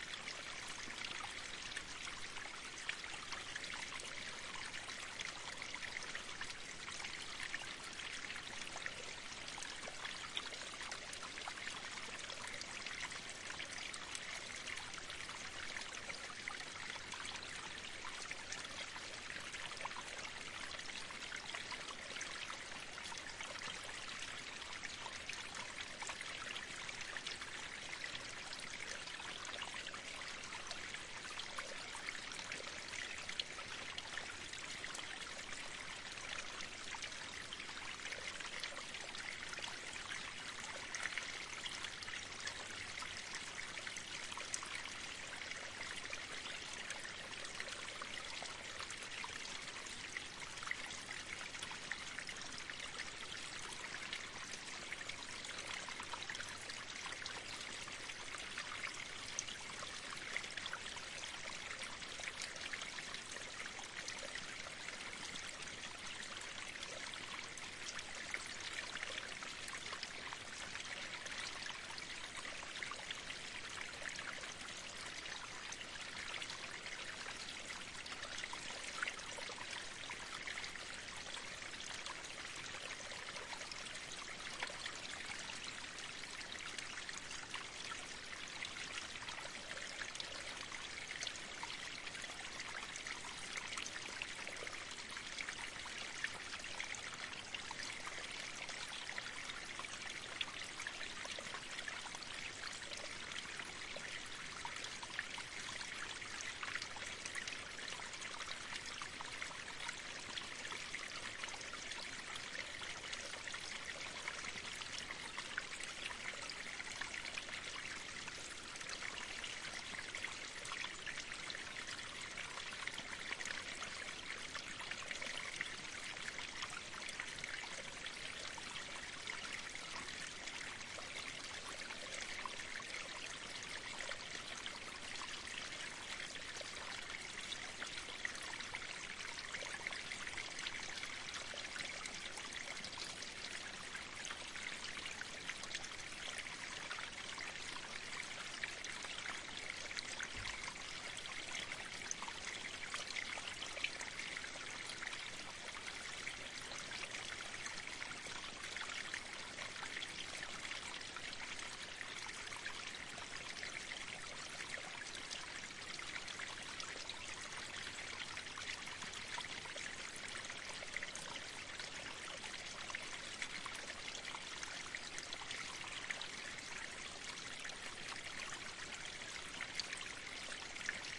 2012-06-09 salmon butte stream 2 edit
A few minutes of a nice stream flowing down a hill in the rainy Oregon forest from left to right. There are some birds in there too and it should loop so you can listen forever. Recorded with a pair of AT4021 mics into a modified Marantz PMD661 and edited (removed some thumps when rain hit the mics) with Reason. Geotag is somewhat approximate.
forest, loop, ambient, outside, rain, relaxing, water, ambience, stream, nature, field-recording